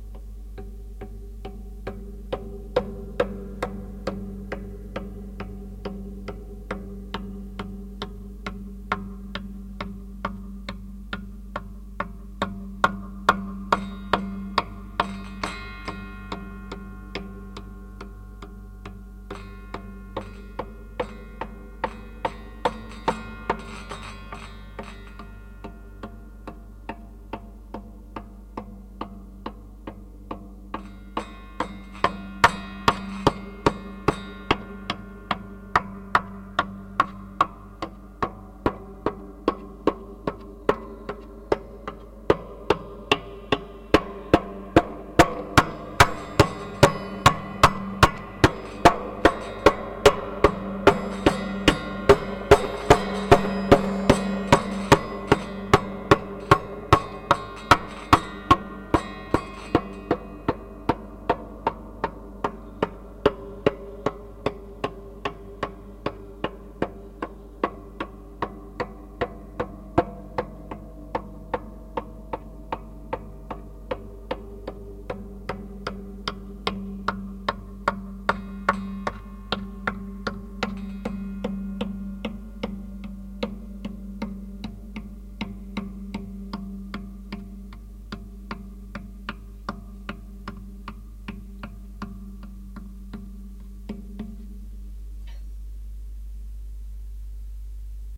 A mouse with a walking stick is jumping on my guitar. It's OK for me. My cat agree. But not my wife.
fun, mouse, weird, funny, animals